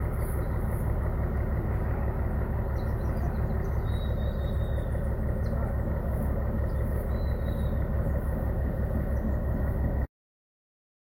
Truck sound motor
car, motor, truck, vehicle